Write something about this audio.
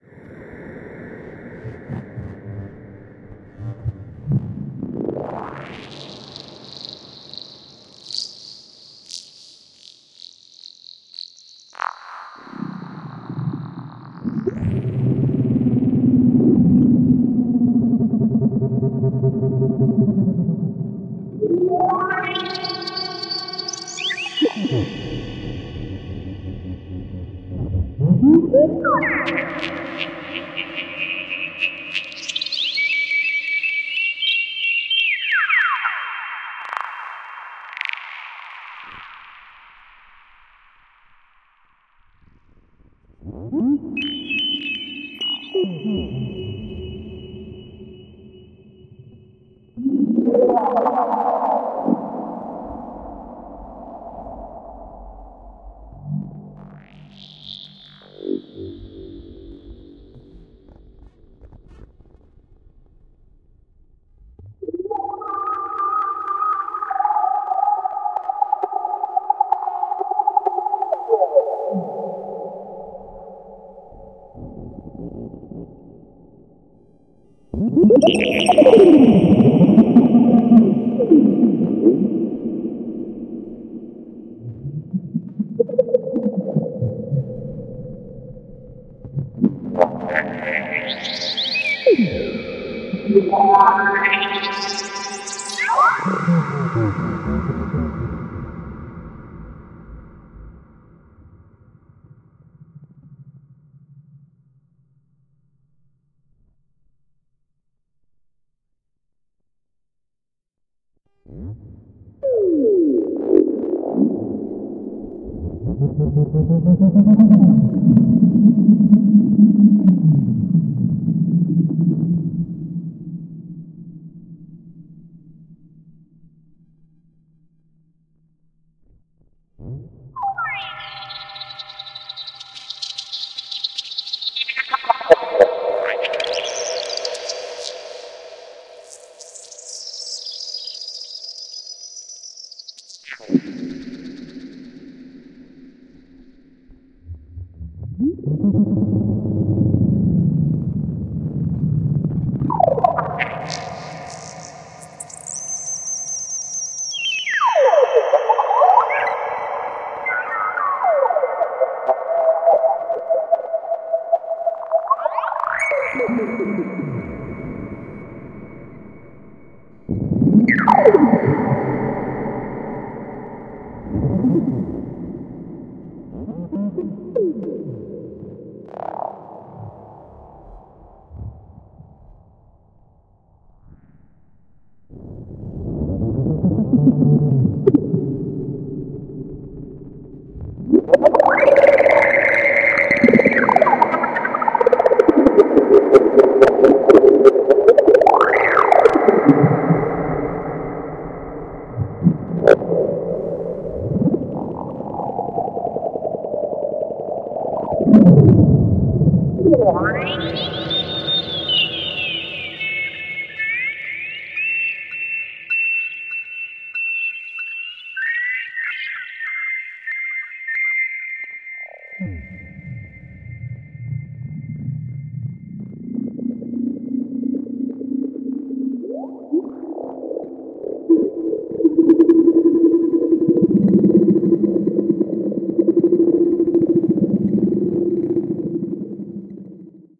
ESERBEZE Granular scape 04
This sample is part of the "ESERBEZE Granular scape pack 1" sample pack. 4 minutes of weird granular space ambiance.
reaktor, effect, granular, drone, soundscape, space